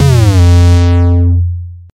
Jungle Bass Hit F0
Jungle Bass [Instrument]